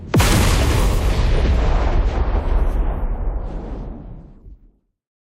Sci-Fi explosion for different effects.
The Effect is created in Adobe Audition 2019 CC.
The source sound was a bomb explosion, which can be found in free access on the Internet without any rights.
Added effects distortion and Sci-Fi style.